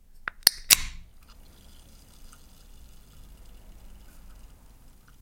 Soda Opening
Opening up a can of Sprite. You can hear the carbonation after opening!
bottle, cola, fiz, fizz, decompression, carbonation, coca, sprite, can, pepsi, open, a, crack, sound, Coke